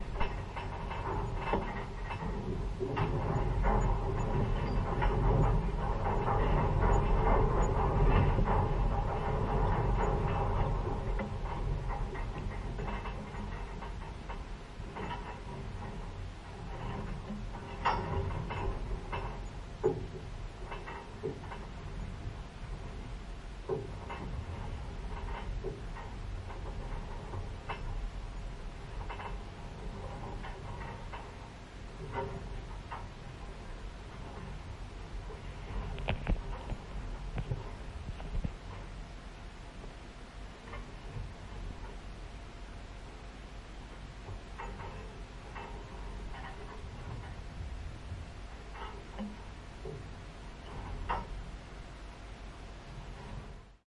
Contact mic recording
Field, contact, mic, recording